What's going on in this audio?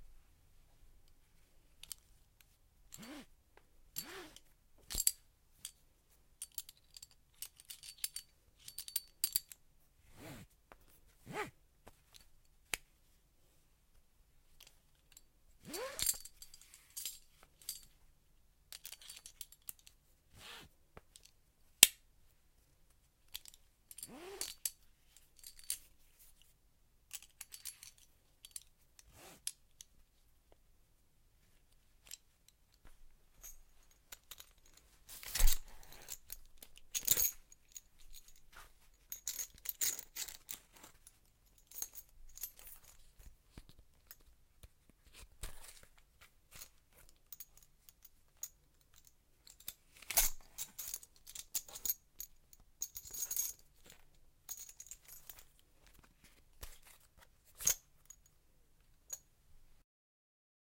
Two different belts, one with a metal clamp and the other a normal belt. Being fastened and loosened.